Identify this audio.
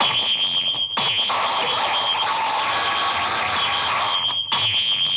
pin distotedloop4m
abstract, distorted, glitch, noise, processed